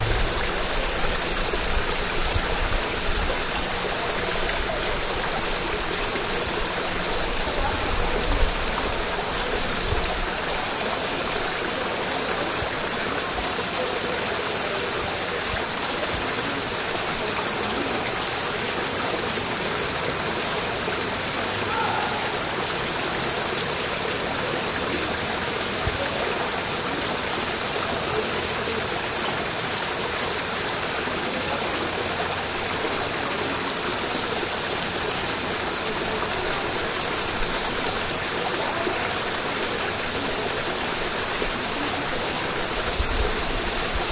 Costa Rica 9 Jungle Stream Insects
ambiance, central-america, costa-rica, field-recording, insects, jungle, nature, stream, summer, waterfall